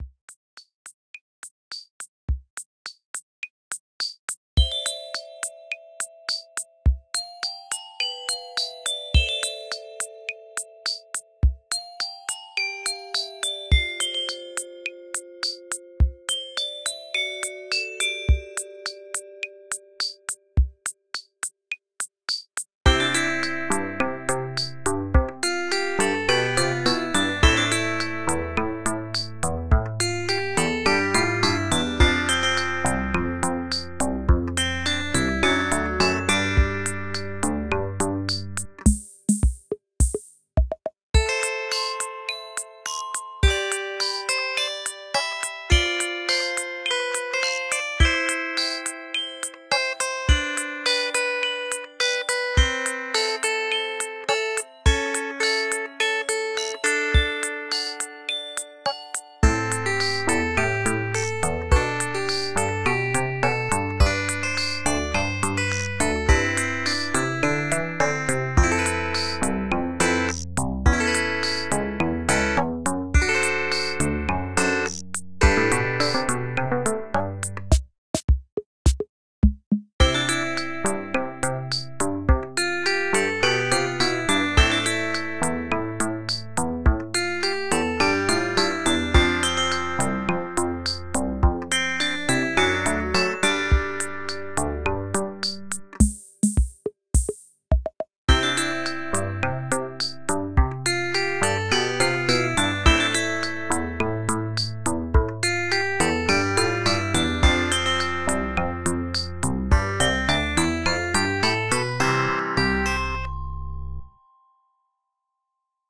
Biking The West Side [full tune]
This is a cute little beat I made for a podcast project for school.
This was created in Garageband v10.3.4, with beat machine, clav, synth bass, and glockenspiel software instruments.
Thanks!
105-bpm, beat, beat-machine, cheery, clav, cute, drum, drum-loop, glockenspiel, happy, lofi, loop, rhythm, small, sweet